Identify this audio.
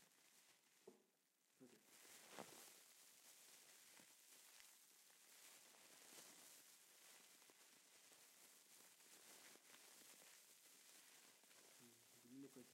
Torch - Plastic 1
torch plastic fire sounds environment natural surrounding field-recording ambient crackle ambience noise
ambient, surrounding, natural, sounds, environment, ambience, noise, torch, field-recording, fire, crackle, plastic